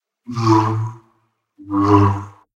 Lightsaber swing. Made with mouth sound and some effects, like reverb, paulstretch and tremolo.
energy, fight, film, game, laser, light, lightsaber, mouth, movie, noise, paulstretch, power, reverb, saber, sci-fi, series, space, star, star-wars, starwars, swing, swings, tremolo, voice, wars